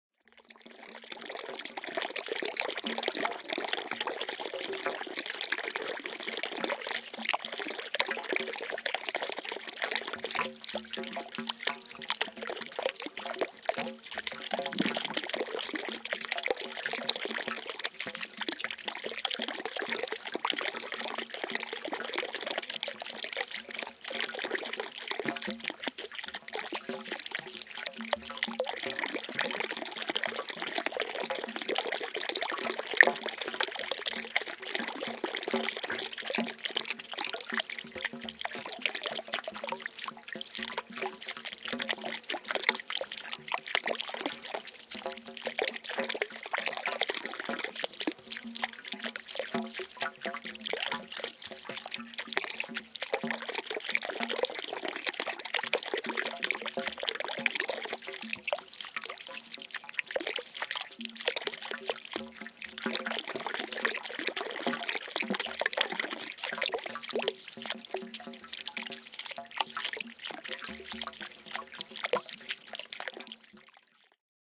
Fast, Low Frequency Dropping Water
Single Small Fountain Recorded with Zoom H4
gurgle, gurgling, ambient, relaxing, shallow, creek, stream, water, babbling, flow, liquid, splash, drip, bubbling, brook, meditation, field-recording, nature, flowing, waterfall, meditative, relaxation, trickle, river